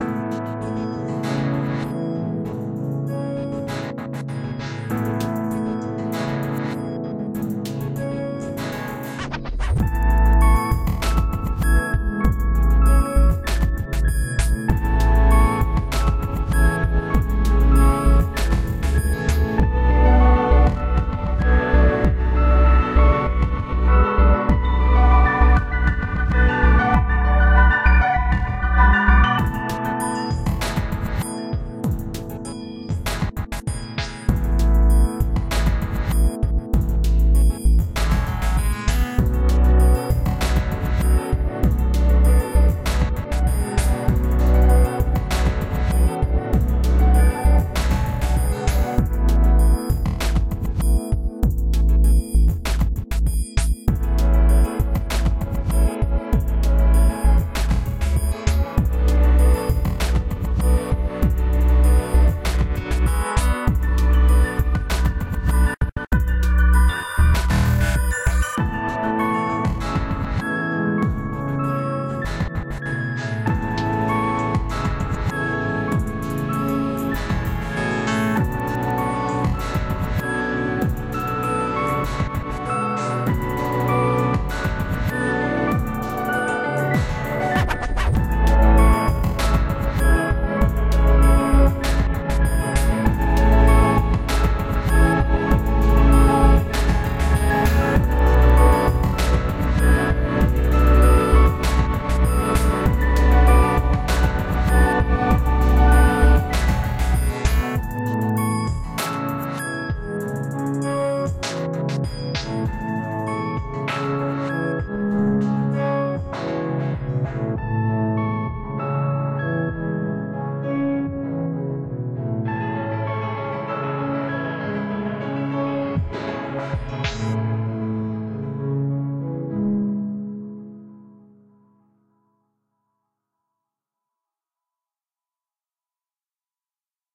OHC 458 - Hotdog Hiphop
HipHop Synth LoFi HotDog